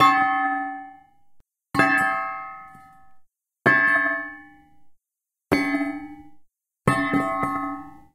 metal tube on carpet
A non-musical tube dropped on carpet. Muffled ring-off. Various drops.
bell, chime, ringing, tube